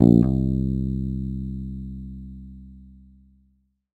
First octave note.
bass, multisample